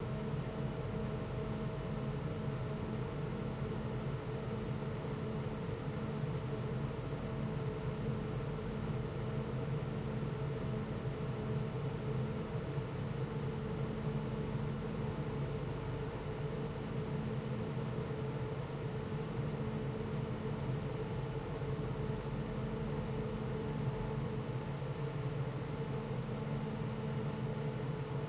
Engine room
room, stereo, Engine